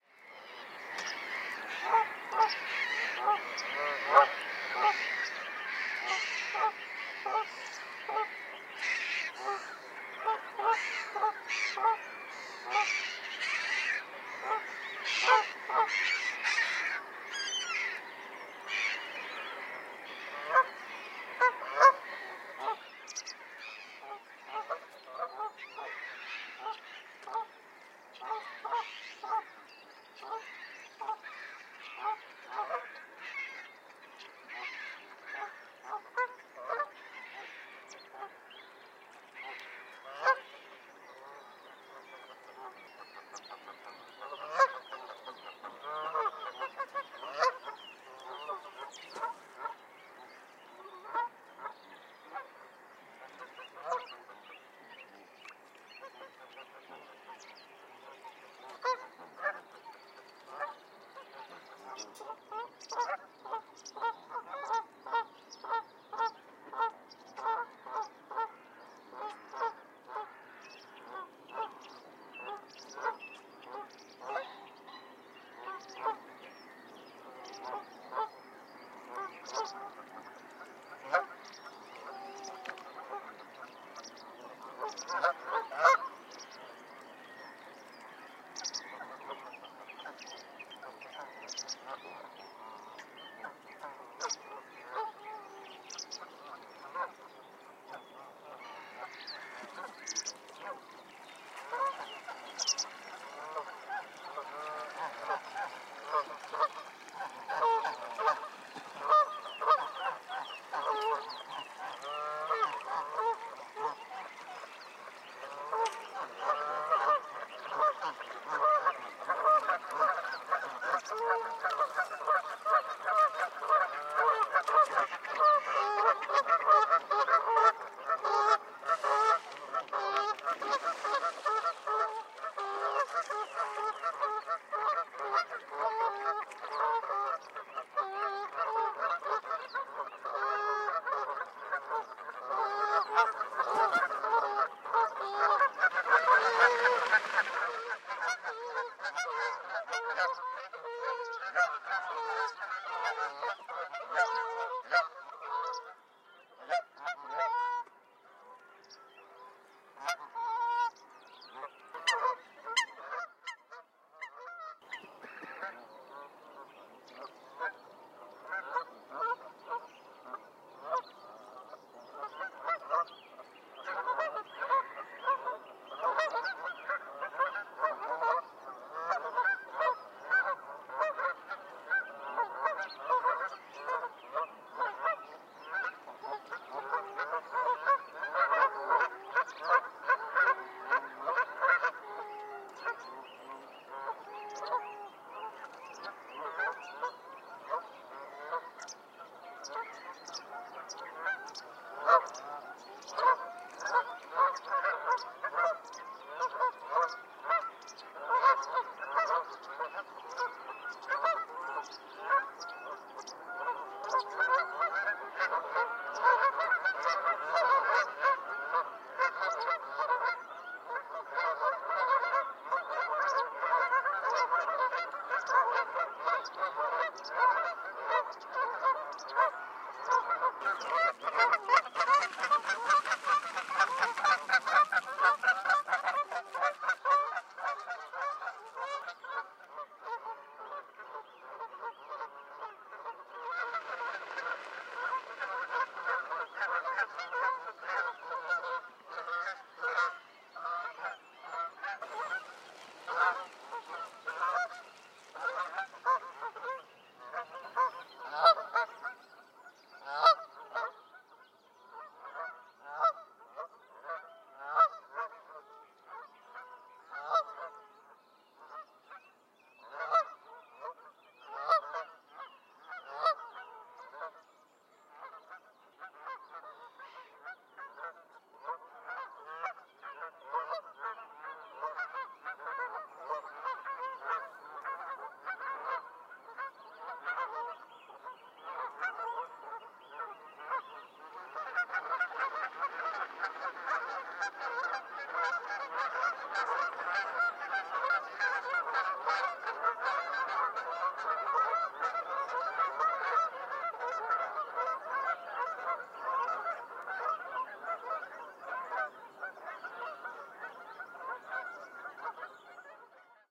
Canada geese recorded this morning at Hanningfield Reservoir, Essex, UK. Can also hear black-headed gulls, pied wagtail, mallard, common pheasant, song thrush and Eurasian coot. The birds can be heard on the water and taking to the air. Several groups of birds at varying distances from the recordist.
Recorded with a Sennheiser K6/ME66 microphone attached to a Zoom H5. Edited with Audacity, high pass filter applied.
birds, canada-geese, canada-goose, geese, goose, nature, wildfowl